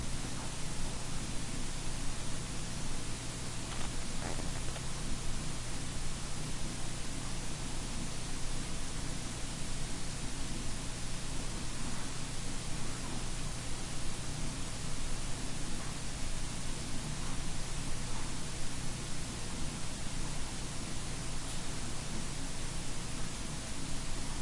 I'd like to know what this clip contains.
The laptop soundcard is noisy, They should have ditched the mic jack, it's so noisy it's useless. Cheap monophonic tape recorders from the 70's had higher fidelity and greater dynamic range from these noisemakes.
buzz, electromagnetic, radiation